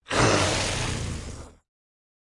A monster voice